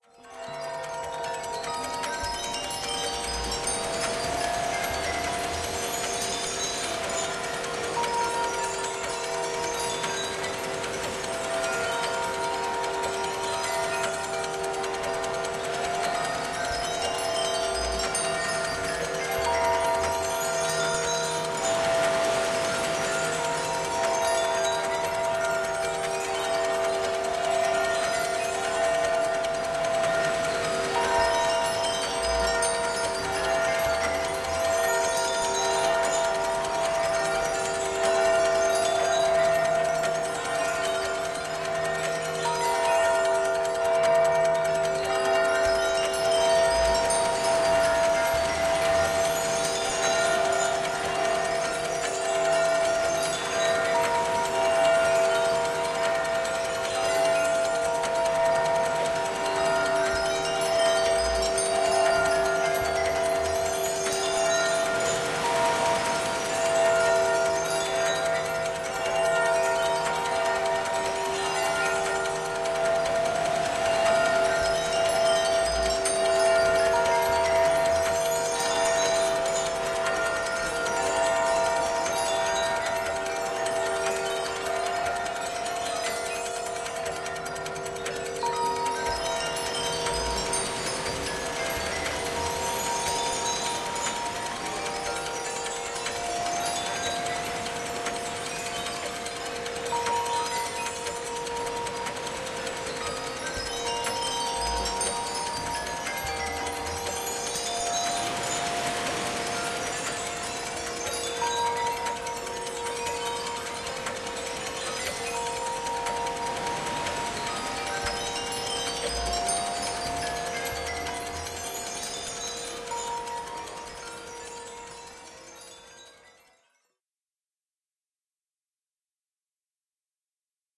The Hourglass
I like to think of this sound as representing the story of someone, like Rip van Winkle, who falls asleep and wakes up many years.
Sounds used: